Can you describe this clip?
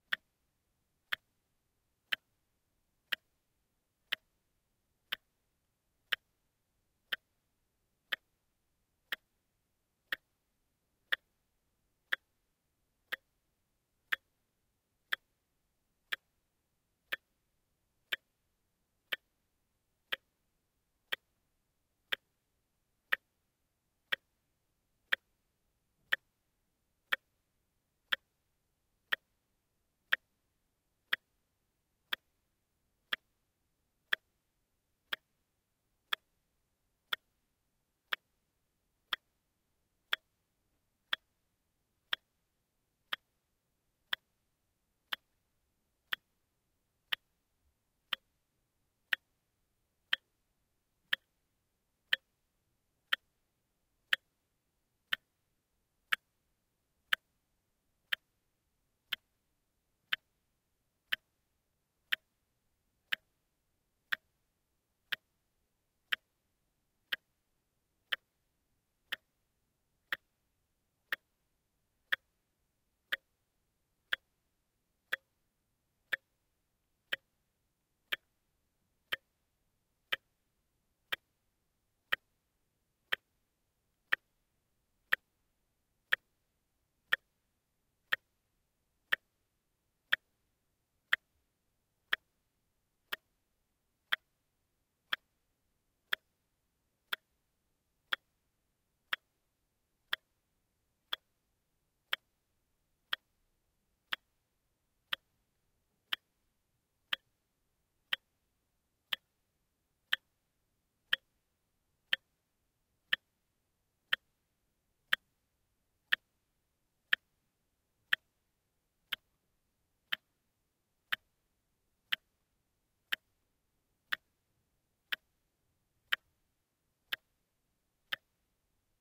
wristwatch,mechanical,clock,ticking,contact mic,loop,denoised
Taped a pair of JrF C-series contact mics on a small, very quiet wristwatch to see if I could get a good signal. Pleasantly surprised by the result.
Removed the quartz hum and de-noised in RX 7.
contact-mic, mechanical, watch